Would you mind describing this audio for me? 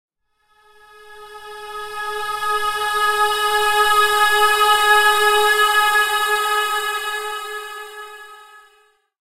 Redemption Choir
Pad sound, with an airy choir type feel to it.